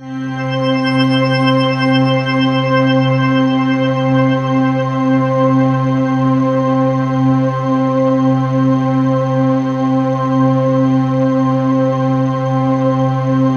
Custom pad I created using TAL Sampler.
synthesizer, atmosphere, electronic, loop, pad, C3, sample, synth, ambient, single-note